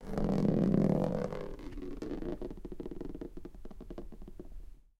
Plastic ball rolling
A small plastic ball (hard plastic) rolling on my kitchen floor. I believe it is a stress ball. The rolling of the ball was achieved with the help of a dear friend.